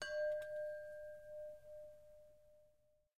wind chimes - single 03
A single wind chime tube hit.
chimes, wind-chime, tone, metallic, windchime, hit, metal, tuned, wind, wind-chimes, windy, chime, windchimes